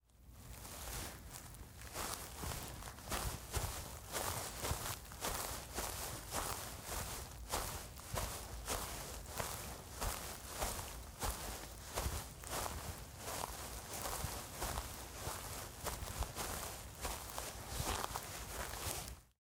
Footsteps Grass 1
Foley of human walking in grass
feet, foot, footsteps, grass, step, steps, walk, walking